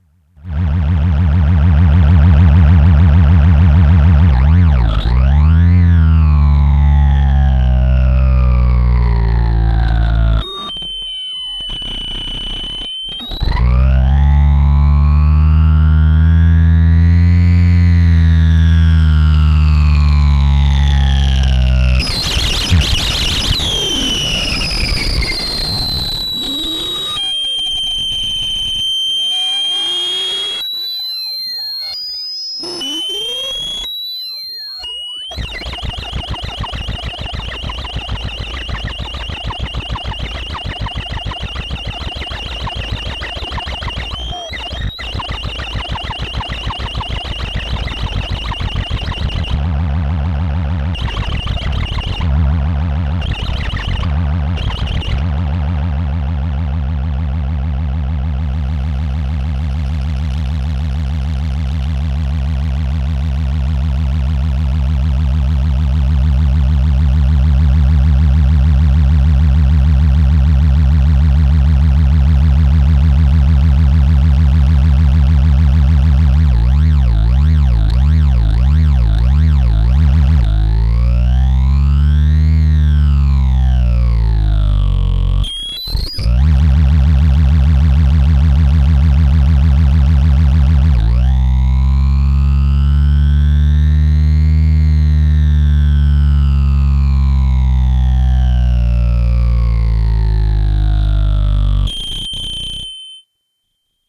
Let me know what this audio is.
Feedback loop made by running aux send out to input and adjusting EQ, pan, trim and gain knobs. Added in another stereo input from zoom bass processor.
feedback-loop, analog, wave